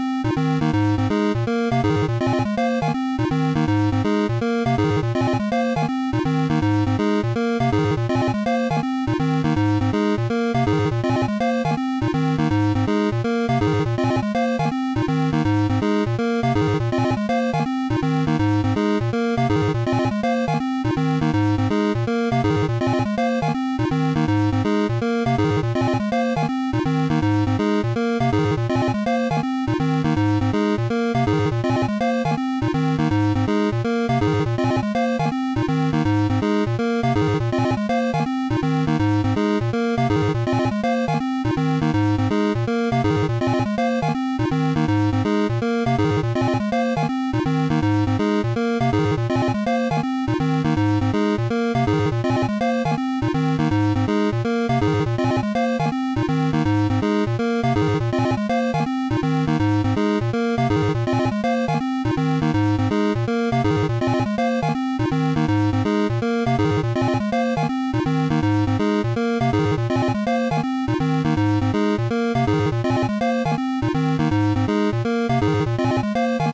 I discovered Beepbox yesterday and decided to try it out, getting the melody as I went. This was the final result. I look forward to do more.
According to my husband it could also be called: Music in a game where you die a lot.
Credits are much appreciated!
I would love to see!
Enjoy!
16-bit, Chiptune-loop, feelgood, rhythm, happy, improvised, loops